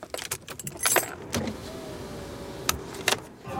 Car Keys Electrical Window Opening Switches
Variation 3) I turn my car key in the ignition slot. I press some buttons and open a window.
Recorded with Edirol R-1 & Sennheiser ME66.
electrical, key, ignition, car, opening, windows, switch, running, turned, idle, turning, engine, motor